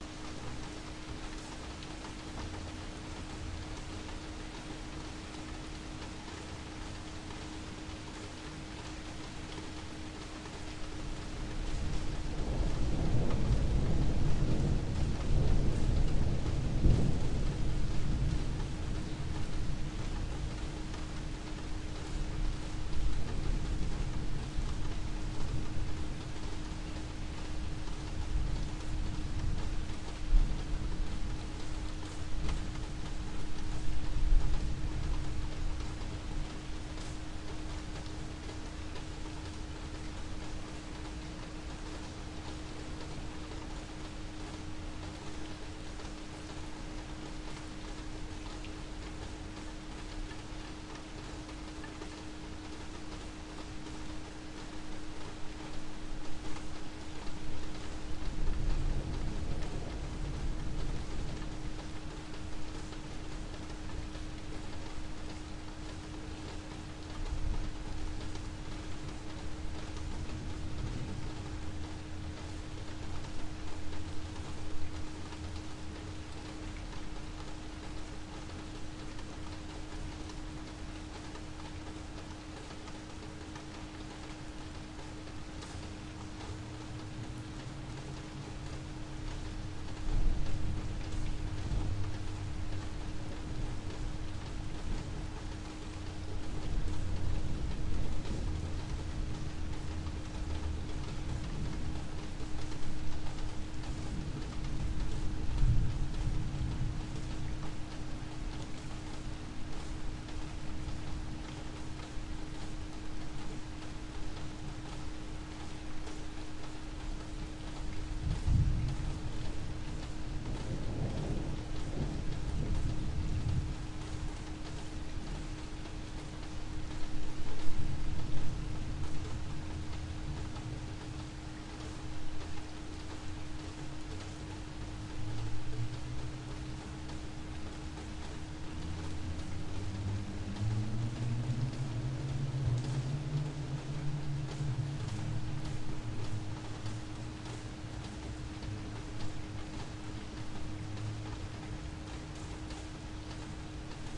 Rain and thunder.
city, field-recording, weather, thunder, rain